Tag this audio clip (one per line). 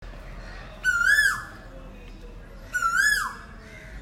Bird
chirp
chirppin